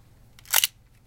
Lego Piece Pressed
This is a Lego clicking that I got from Hoscalegeek and I shortened it incase someone wants a different version.
Click, Film, Hoscalegeek, Lego, Lego-Brick, Movie, Plastic, Pressed, Squeezed, Stop-Motions